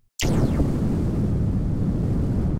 Sci Fi Explosion 1
I made this by altering the frequencies of an explosion I made by blowing into a microphone.
Battle, Laser, Space, Explosion, Sci-Fi